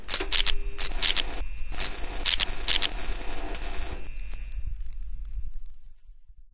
Magical Zap
Energy, light, power of electrons and protons unite! Smash this non-believer into their molecules! For there is no smaller unit of existence!
Edited with Audacity.
rpg, ampere, game, spellcaster, priest, video-game, hexer, electricity, cast, magnetic, zap, zapping, magic, caster, industrial, role-playing-game, shaman, spark, gothic, power, sorcerer, magical, sparks, light, game-sound, volt, spell, witch